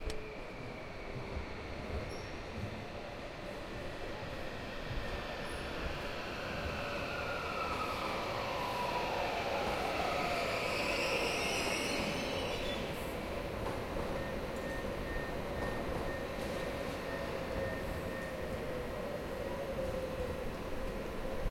Zagreb Train Arriving
Zoom H1 Zagreb Train station morning commuter trains
station, platform, train, arriving